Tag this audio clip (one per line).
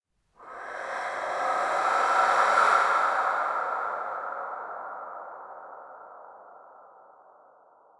Reverse
Ambient
Space
Breath
Reverb